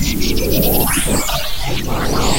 Into Hyperdrive
black-hole, glitch, noise, scifi, space, space-ship